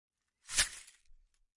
Pile of broken glass gathered in a felt cloth and shaken. Close miked with Rode NT-5s in X-Y configuration. Trimmed, DC removed, and normalized to -6 db.
broken, glass, shuffle, shake